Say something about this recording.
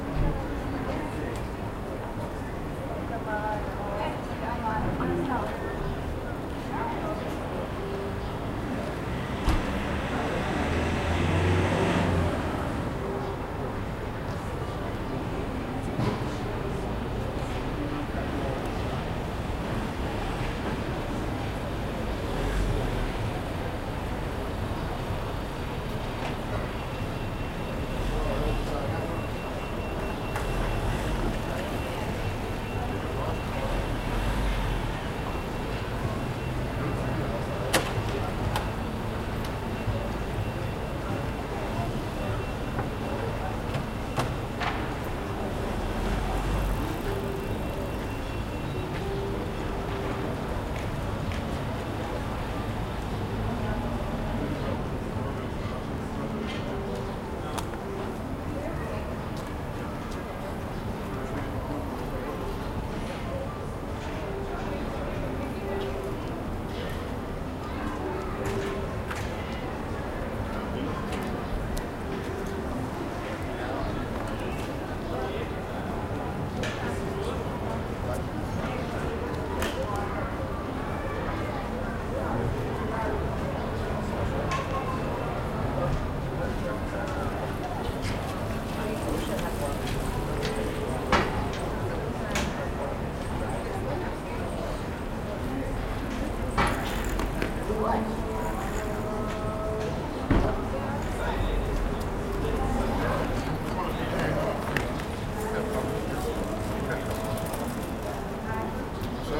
Stereo field recording of the Cyril Metodov Trg in Ljubljana/Slovenia.
It is a fine early summer evening and lots of tourists and locals are underway.
The Metodov Trg is a pedestrian area, lots of people pass the recorder, speaking a number of different languages.
Recorded with a Zoom H2n, mics set to 90° dispersion.
This recording is also available in 5.1 surround. Drop me a message if you want it.